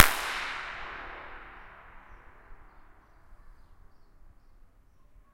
clap at saltdean tunnel 7
Clapping in echoey spots to map the reverb. This means you can use it make your own convolution reverbs